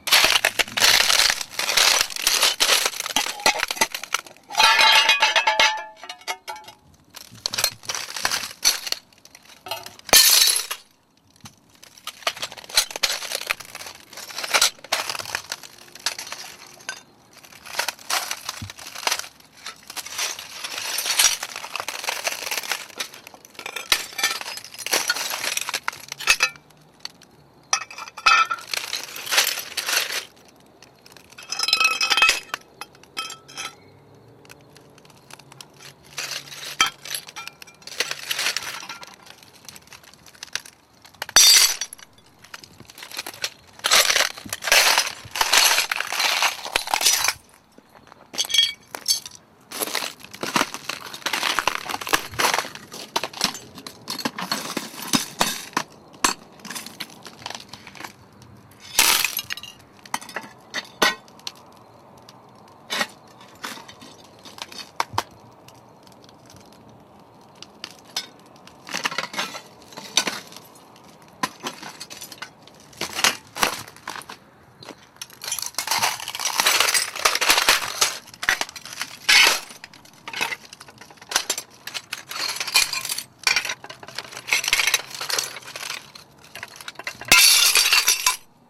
Glass Steps
A long, raw, unedited clip of footsteps on broken glass. Field recorded with no post filtering.